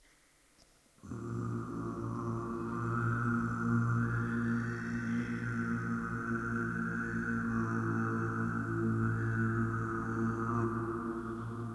This is a short sample of my kargyraa throat singing, with some re verb. I have been practising throat singing for about 4 months, this is the result I have so far.
You can use this without any credit, It did not really take much effort either haha. ENJOY!
Thanks!